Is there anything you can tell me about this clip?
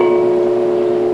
unidentified bell tone recording, probably made with a handheld cassette recorder, then sampled with a k2000.
warm, bell, tone, lofi, lo-fi, wave, acoustic